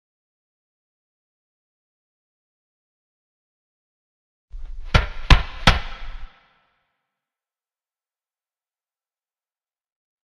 Three short, sharp thumps on a metal box, processed to give a fuller, deeper sound
box, thumps, three